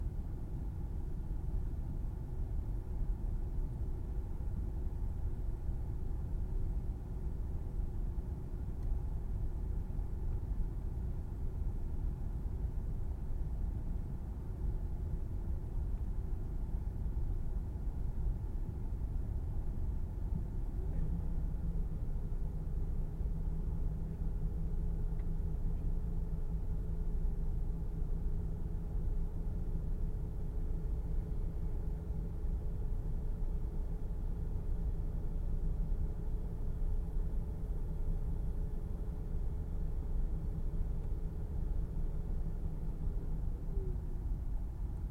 The monophonic glory of my center air conditioning vent regulating the temperature inside a 1997 Nissan.